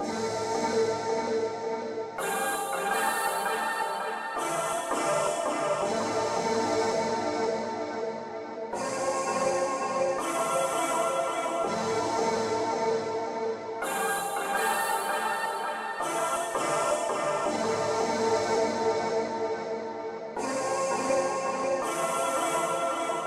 pad i made with layered samples